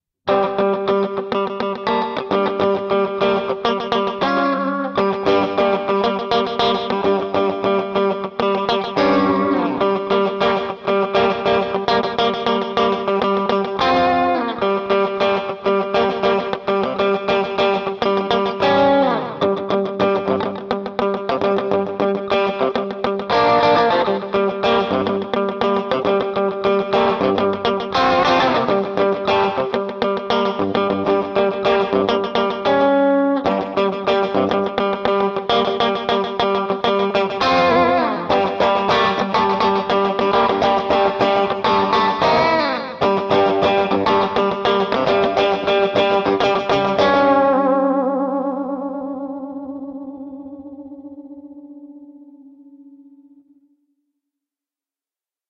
Floyd Filtertron Riding G
Maybe think of it like an alarm clock or pulsing type of rhythm notes with some vibrato/tremolo. Probably good to connect two different scenes together or used for a tense or even fast action style of scene. Surf rock, alt country rockabilly style playing
alarm, clean, clock, country, cowboy, electric, guitar, notes, reverb, rhythm, rock, rockabilly, strange, surf, twang, western